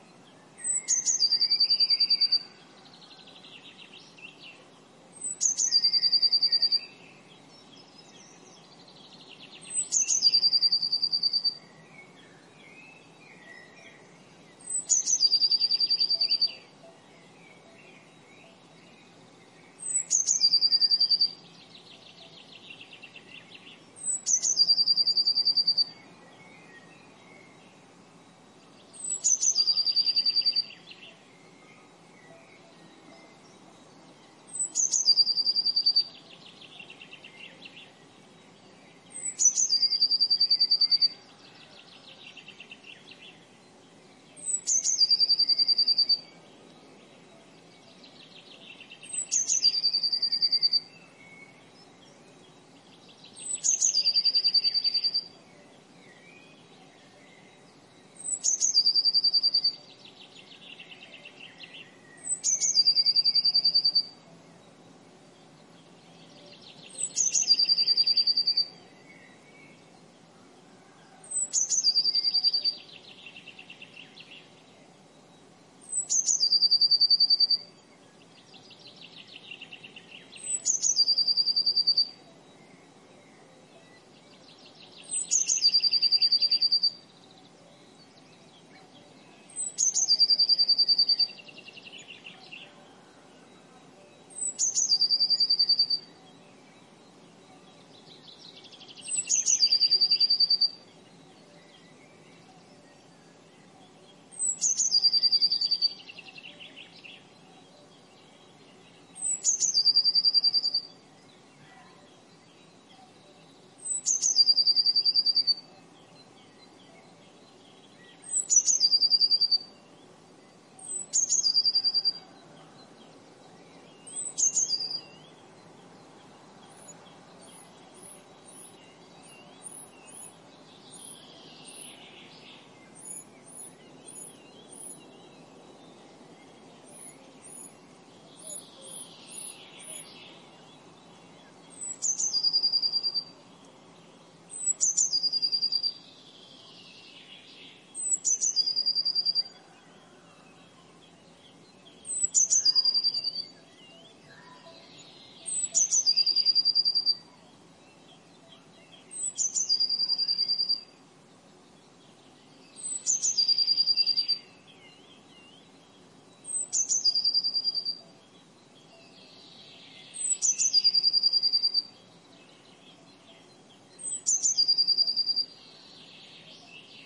20150331 04.little.bird
Foreground, a little bird sings a monotonous pattern, other birds singing in background. Primo EM172 capsules inside widscreens, FEL Microphone Amplifier BMA2, PCM-M10 recorder. Near Aceña de la Borrega, Caceres province (Extremadura, Spain)
extremadura, Spain